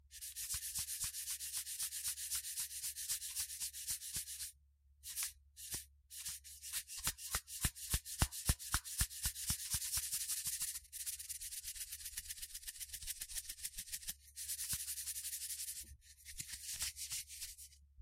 a semi rithmic saltshaker.
salt-shaker, shake